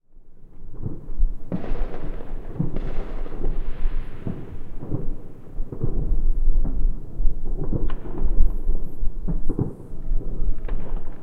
Firework background
New year fireworks
explosion, firework, new-year